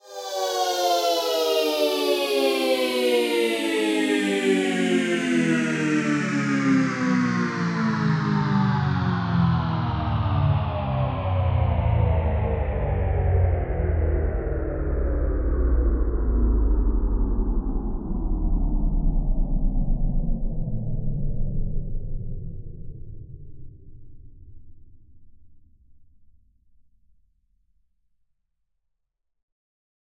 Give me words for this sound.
Made from female vocal samples and processed in edison wave-editor
descending, experimental, falling-pitch, female, power-down, stopping, switch-off, unplugged, vinyl, vocal, voice, winding-down